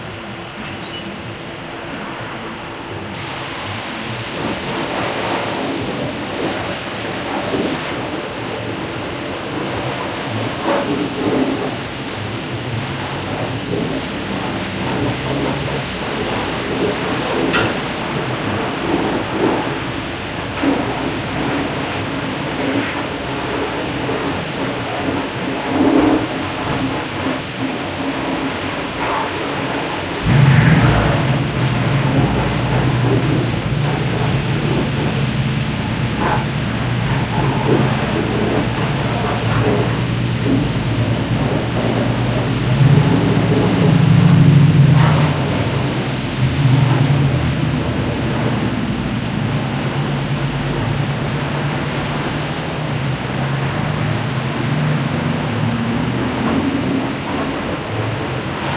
The sounds of a self-service car wash.
field, nature, industrial, recording, water